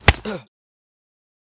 Sharp Impact Man OS
Impact Male Voice
Impact, Voice, Male